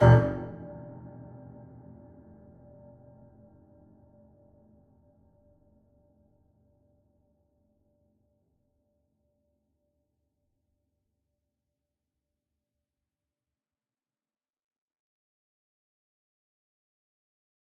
short hit with reverb
A short hit with long reverberant tail. Processed audio recording.